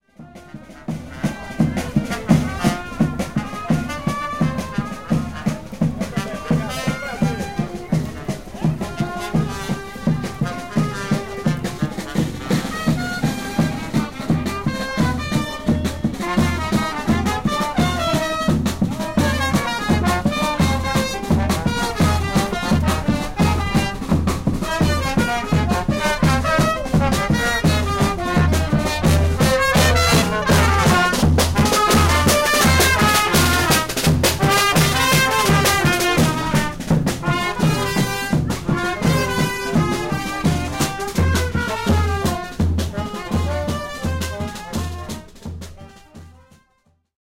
Fortaleza election campaign
Ambient recording in Fortaleza, Brazil, on one of the big squares in
the old town, a small band of street musicians with bass drum,
snaredrum and trumpets plays a well known carnival marching tune. Dat-recorder, unprocessed, just fading in and out.
brazil, drum, field-recording, music, street, trumpet, urban